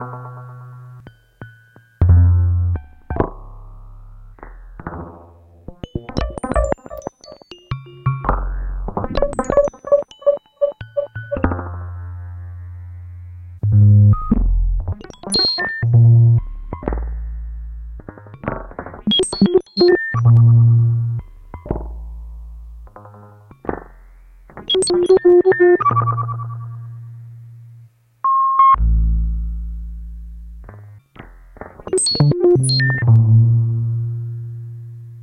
Formatted for the Make Noise Morphagene.
This reel consists of a spliced bleeps and bloops.
Hertz Donut, Maths, Wogglebug, Qmmg, EHX Memory Boy.